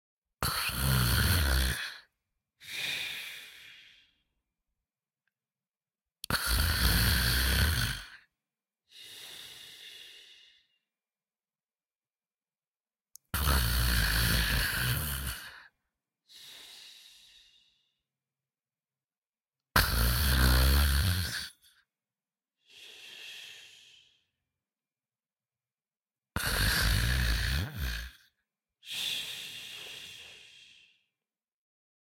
Male Snore 4
male-snore, honk, snoring, sleeping-man, man-snores, male-snores, man-snoring, nose, bed, sleeping, male-snoring, breathing, sleep, snore, sleeping-male, snores, heavy